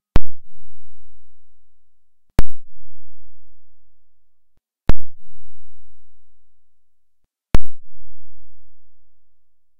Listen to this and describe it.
My sound card makes this sound when you turn on phantom power.
button, click